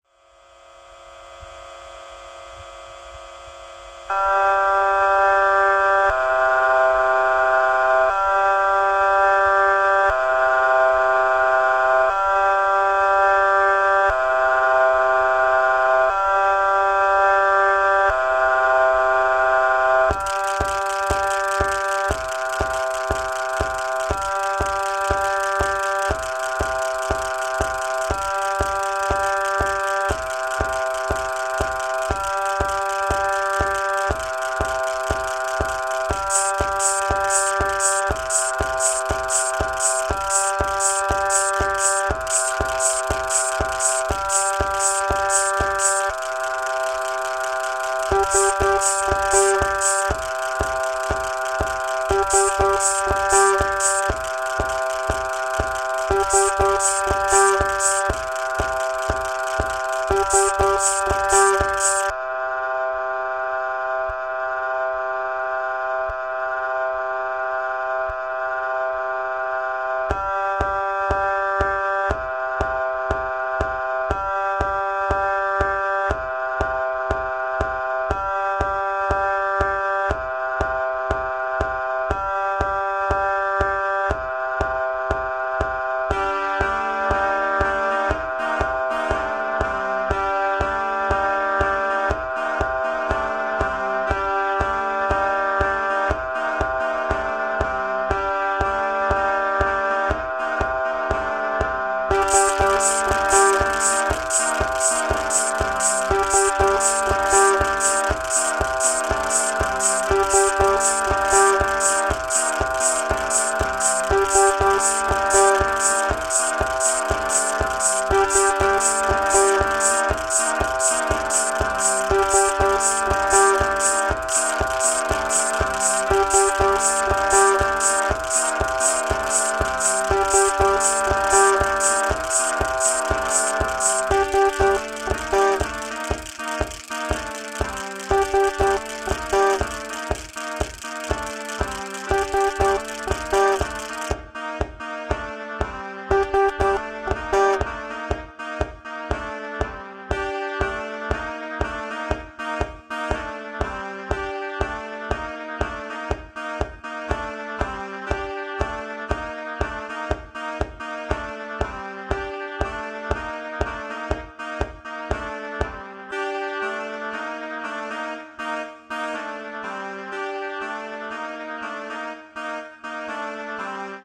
protoplasto jędrzej lichota
Piece of sound production made by my student Jędrzej Lichota. He paricipates in Ethnological Workshops. Anthropology of sound that I conduct in the Department of Ethnology and Cultural Ethnology at AMU in Poznań.
ambience, anthropology-of-sound, music, Pozna, synth